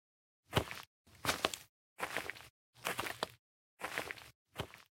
Some steps on gravel. Cut it up and randomize to sound like walking!